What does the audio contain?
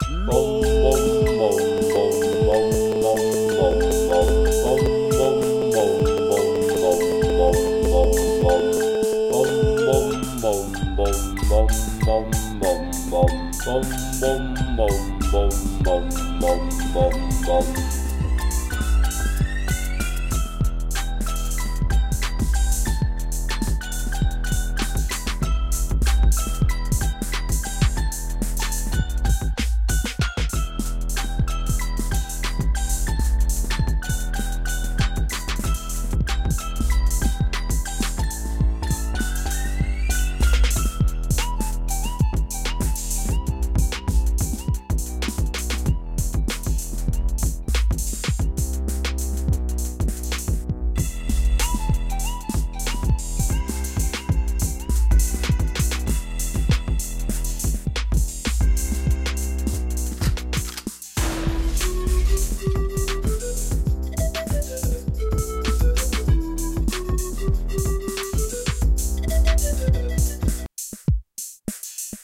Mixed together
Samples used:
449322__graham-makes__flutey-loops
211869__wikbeats__110-loop
beats, Love-yall, mixed, Thanks, Thanks-For-Help